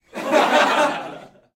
Recorded inside with a group of about 15 people.